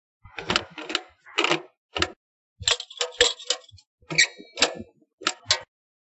Telefono publico
a recording of a public phone,
done at 3 am, at an avenue in Zapopan.